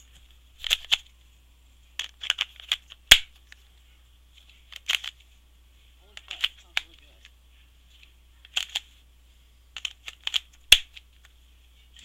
I tried to record a sound of me ejecting and reinserting a Game Boy game - unfortunately, the quality of my microphone has all but destroyed this sound. I'm sure there's a better use that this could be put to, though.